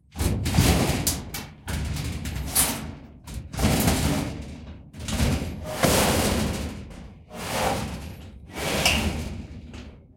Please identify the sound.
long-metal-scrape-03
Metal hits, rumbles, scrapes. Original sound was a shed door. Cut up and edited sound 264889 by EpicWizard.